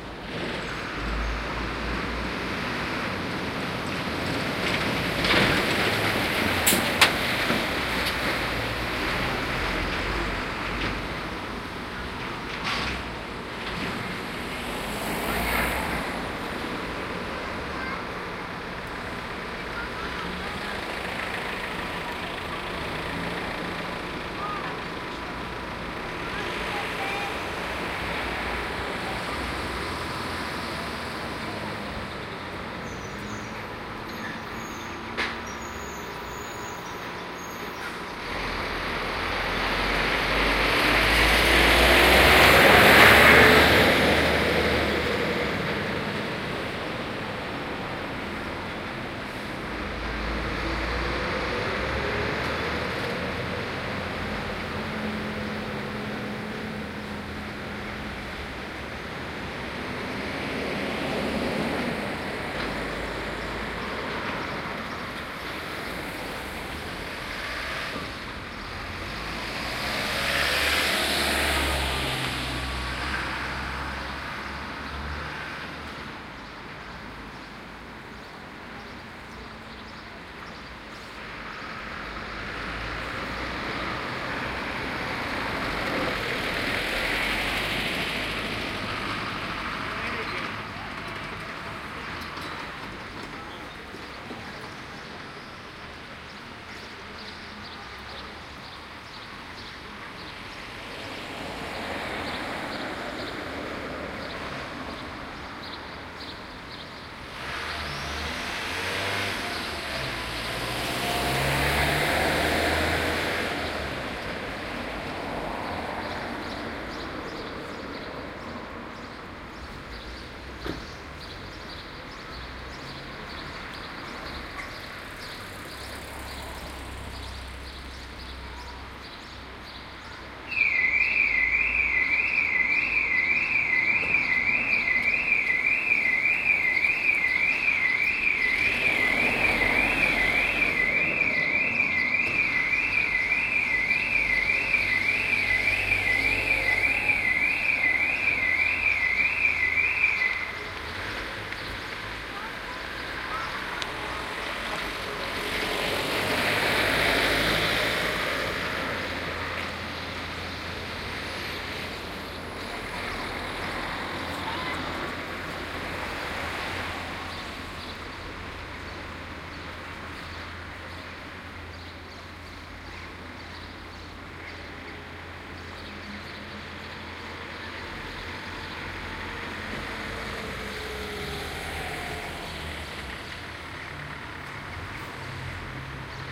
In the summer there is always a lot of traffic in these scottish villages. The equipment I used was the Sony HiMD MiniDisc Recorder MZ-NH 1 in the PCM mode and the Soundman OKM II with the A 3 Adapter. Give me nature anyday!:)